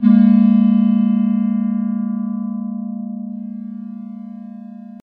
Just a small test with harmonics, and placing a floor and ceiling on some sine waves.